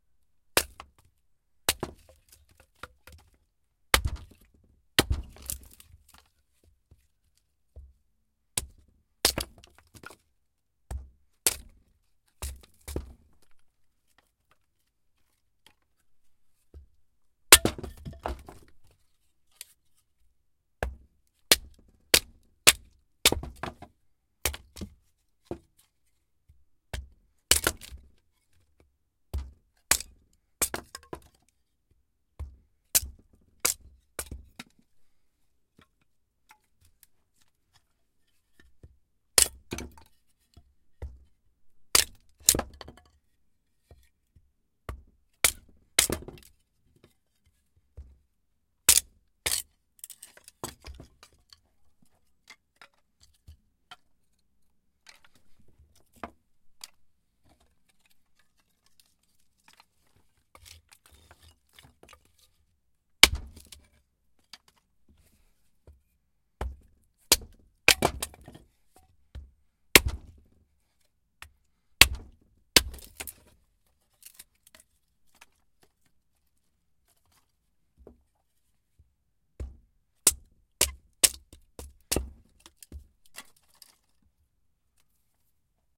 Choping wood with an ax in rural environment
cut, sound, field-recording, breaking, cleaver, nature, forest, choping, wood, ax, hatchet